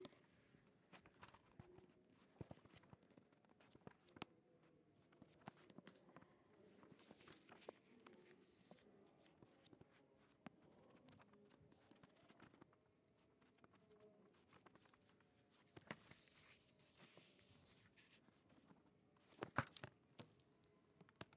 Boom Folie BookPages
Flipping a book's pages.
Book, books, page